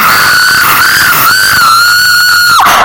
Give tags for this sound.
spooky horror jumpscare scary scream creepy loud

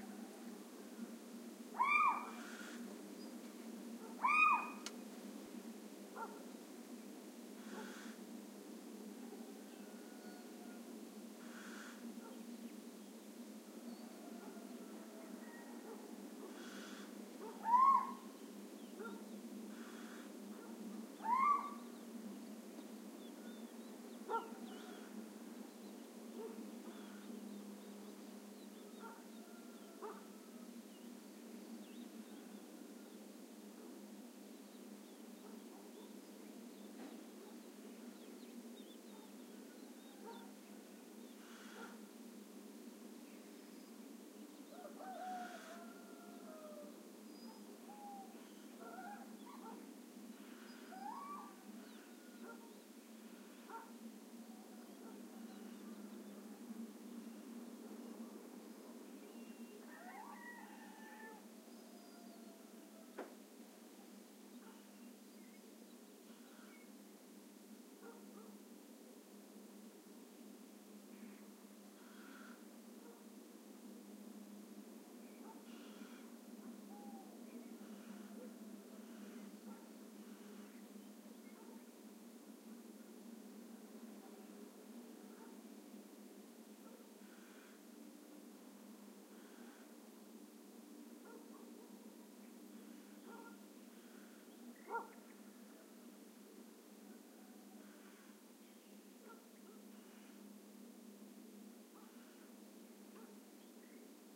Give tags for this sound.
birds field-recording forest little-owl nature south-spain spring

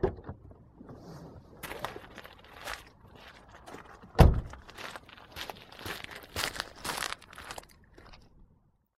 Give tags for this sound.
slam Car gravel door open steps close

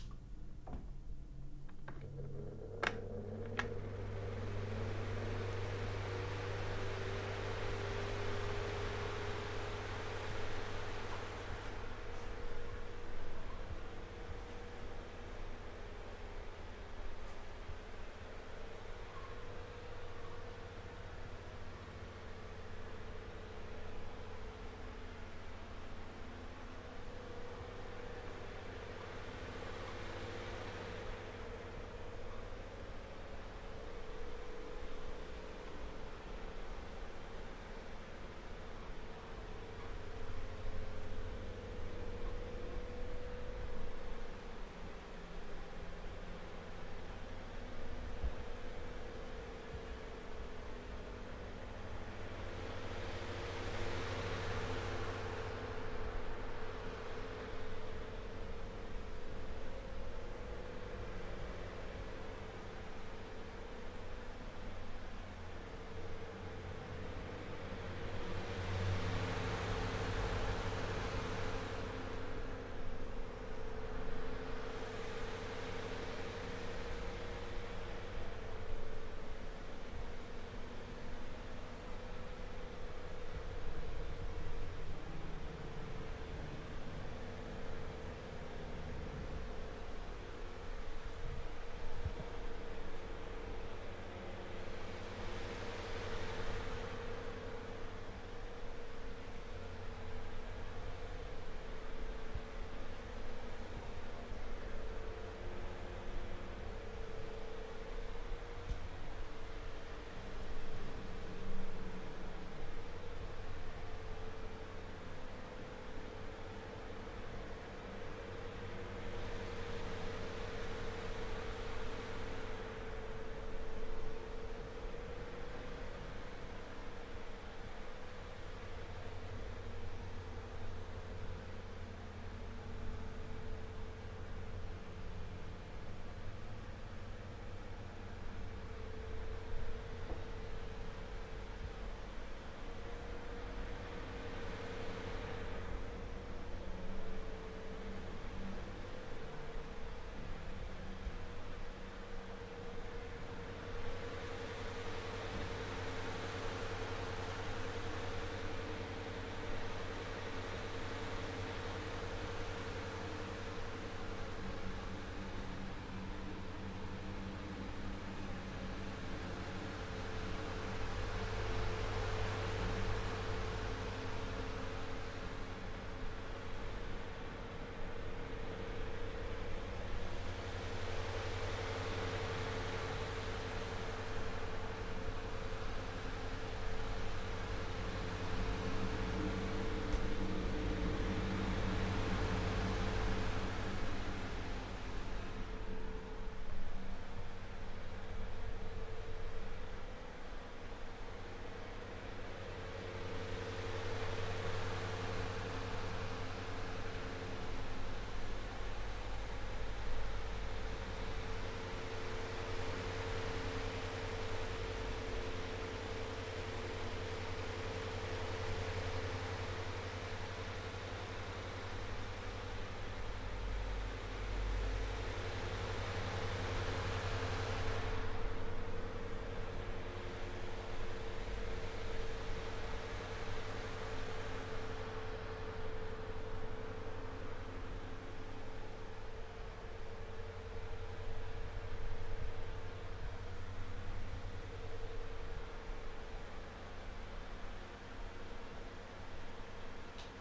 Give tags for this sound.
airing fan ventilation ventilator

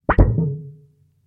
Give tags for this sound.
cd clear disc disk flex flexed flexing flip flipped flipping plastic polycarbonate shake shaker shaking spacer thin vibrate vibrating vibration vinyl wobble wobbler wobbling wobbly

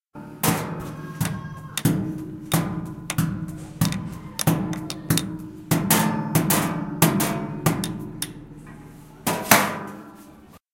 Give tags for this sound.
Piramide-Ghent Eda Sonic-Snap

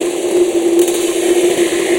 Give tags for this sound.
deep 60-bpm space dub dubspace loop

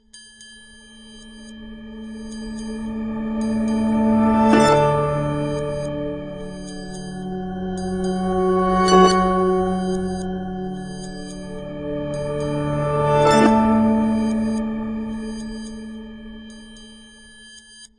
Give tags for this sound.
Continuum-5 harp loop symmetric triangle

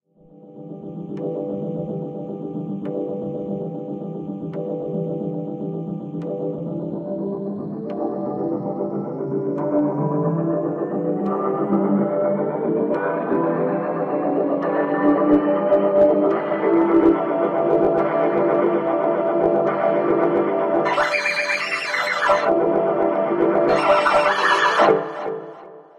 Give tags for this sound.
ambient artificial drone evolving experimental soundscape space

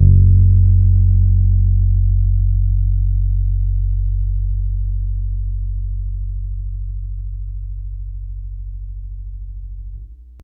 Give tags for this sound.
fender multisample

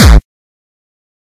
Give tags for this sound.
hard bass techno kick melody trance kickdrum distortion drumloop synth progression beat distorted drum hardcore

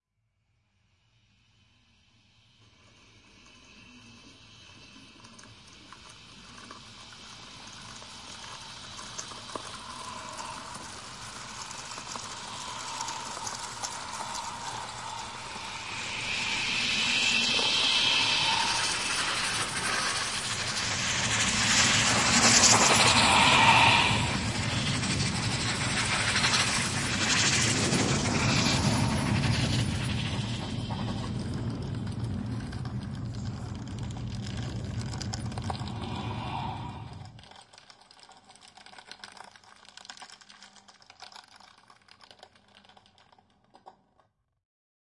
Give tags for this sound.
binaural convolution keyboard noise paper signing test

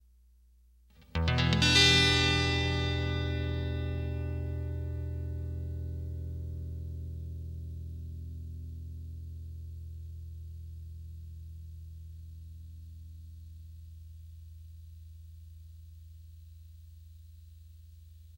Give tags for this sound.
blues chord e7 guitar

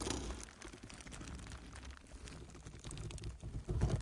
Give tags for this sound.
cloth crumple paper plastic

